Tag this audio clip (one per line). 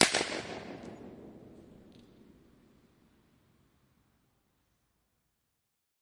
arch
convolution
echo
impulse
reverb